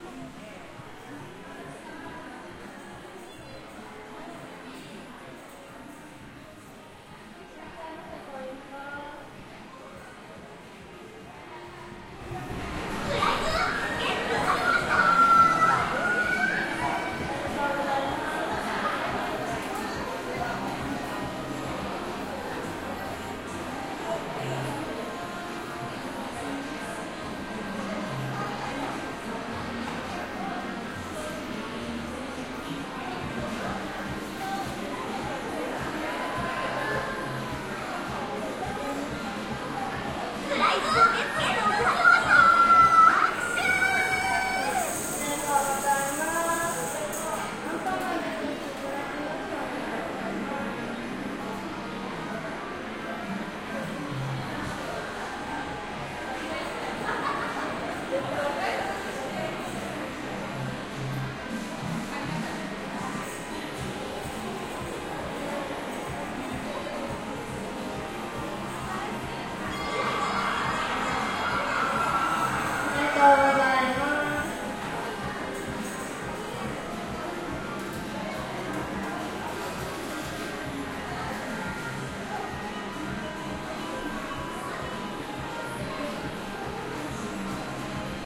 Tokyo - arcade center floor 1 CsG
ambience, arcade, japan, sega, tokyo